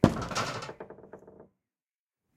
Shed Door Close
A shed door being closed firmly.
wood, open, close, handle, shed, wooden, lock, gate, door, creak, closing